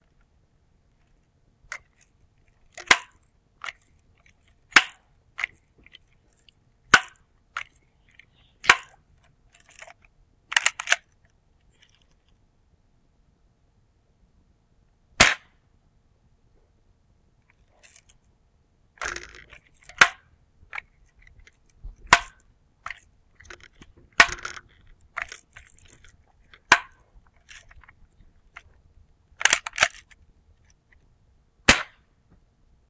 Pumping and shooting a Pumpmaster 760 .177 BB gun
Two pump ups and shots from a Pumpmaster 760 .177 caliber BB gun.
177, air, air-rifle, bb, gun, pump, pumpmaster, rifle, shoot